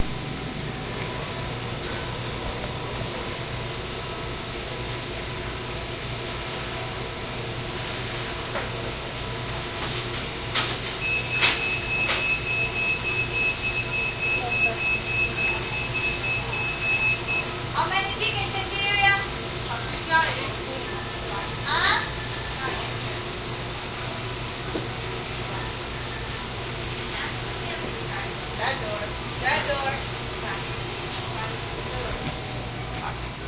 Testing the DS-40 with a homemade windscreen. Fast food joint interior.
ambient, field-recording, restaurant